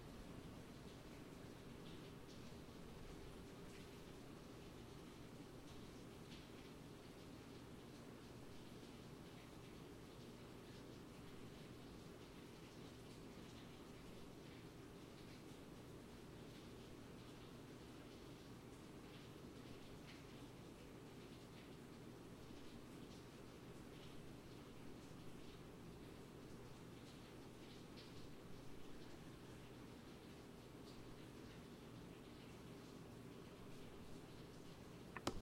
INT RainOutside Quieter
Rainfall ambient from far interior of my house using Zoom H4n onboard mic.
porch, walla